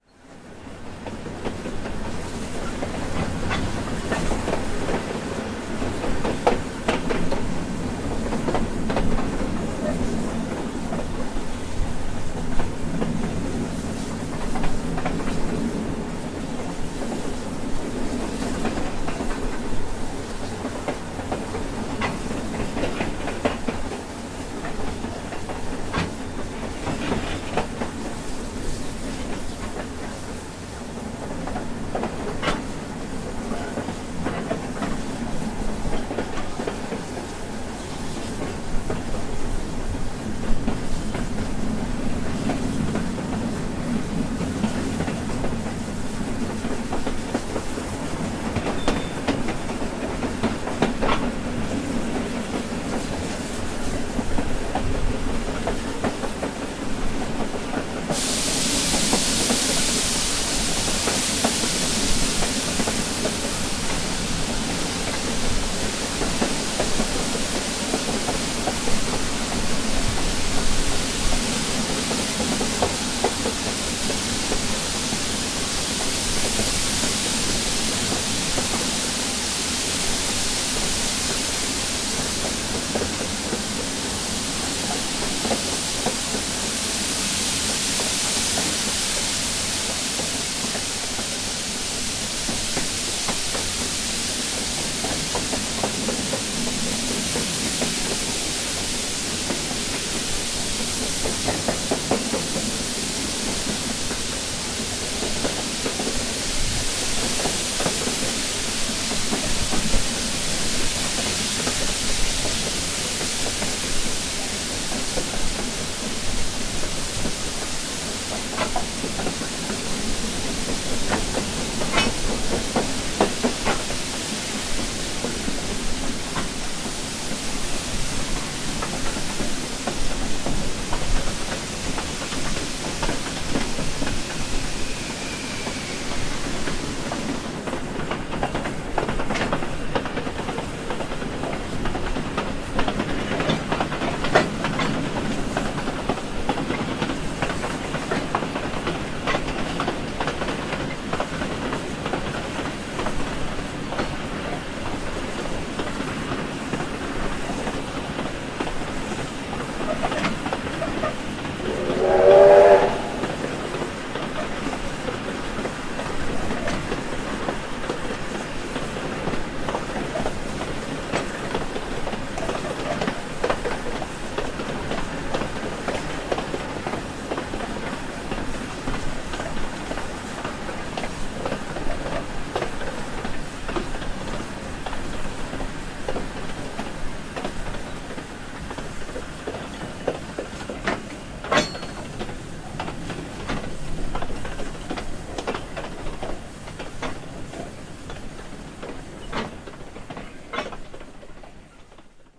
Recorded from onboard a steam train using a Zoom H4